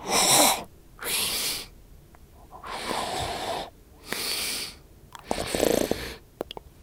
lorenc fx27 breath
male, sfx, vocal, mouth, fx, voice, effect, sound, human, sounddesign, breath, soundeffect